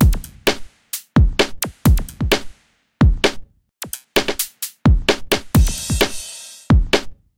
Electro Fab 001
Produced for music as main beat.
drum, loops, industrial, electro